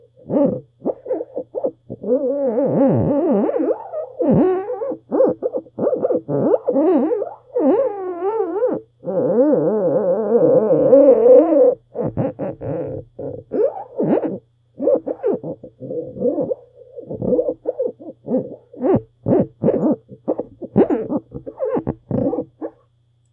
Rubbing a pair of Polo Ralph Lauren glasses against a piece of green foam
This is the sound it makes when you rub the plastic frames of a pair of thick-rimmed, black Polo Ralph Lauren brand glasses against a green piece of foam used to cover a microphone and reduce wind.
rubbing, weird